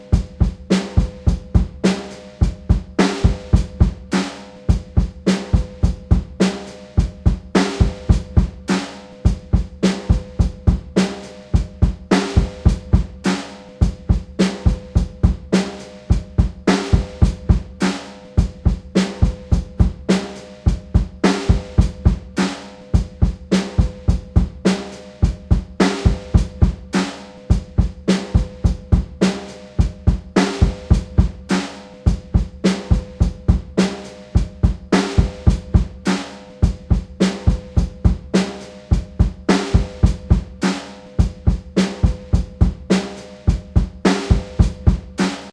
Simple drum loop I recorded with my H4N digital recorder.
drumloop, drums